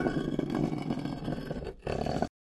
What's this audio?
Scraping Stone
Dragging a metal axe across a stone / concrete paving slab. Sounds like something from an egyptian / Indiana Jones style booby trap.
concrete, scrape, scraping